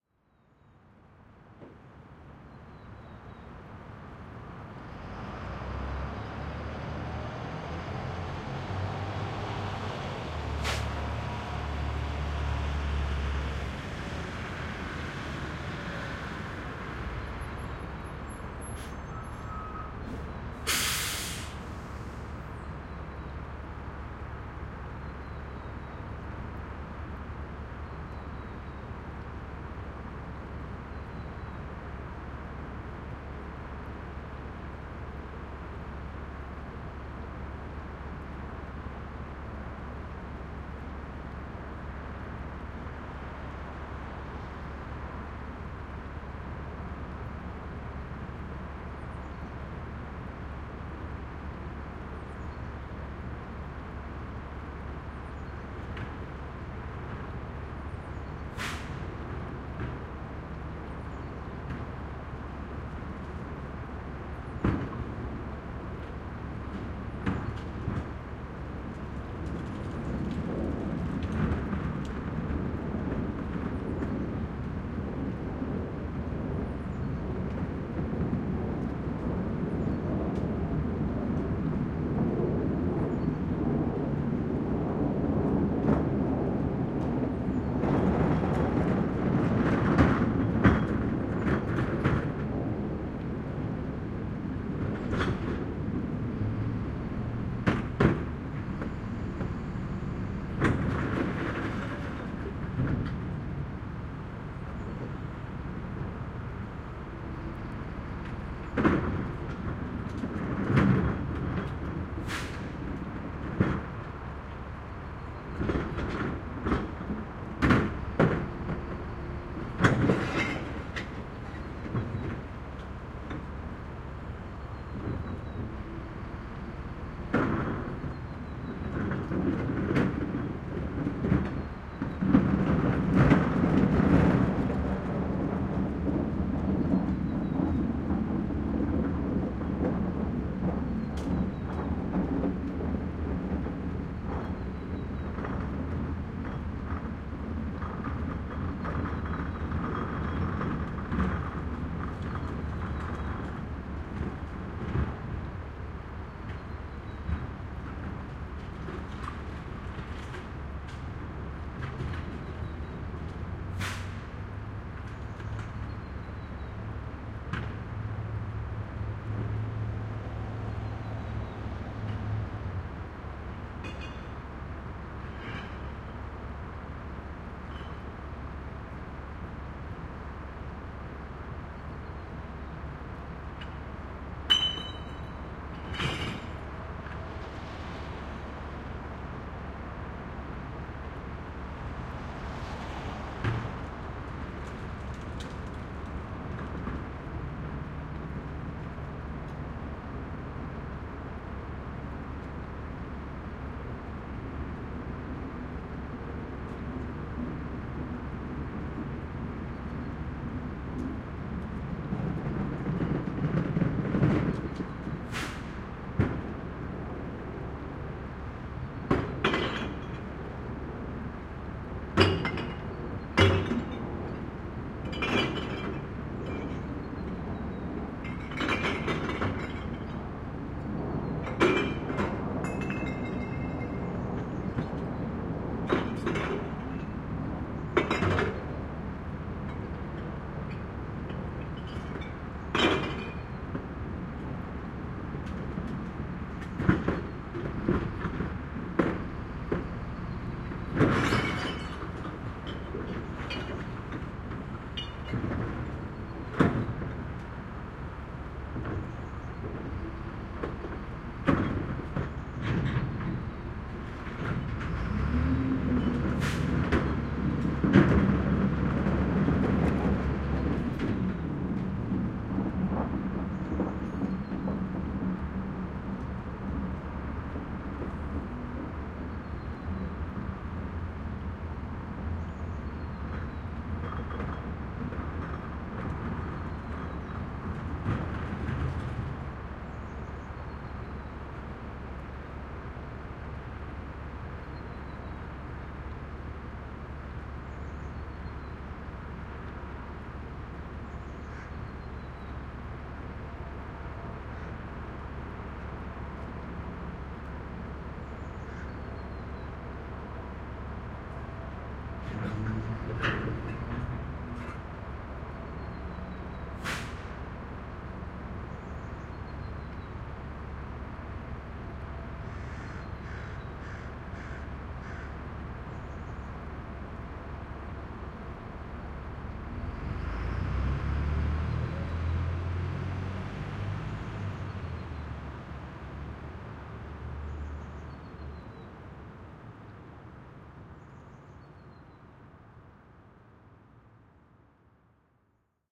VEHMisc recycling truck collecting recyclables tk SASSMKH8020
A truck arrives to pick up the garbage/recycling. It empties the bins and then drives away.
Microphones: Sennheiser MKH 8020 in SASS
Recorder: Sound Devices 702t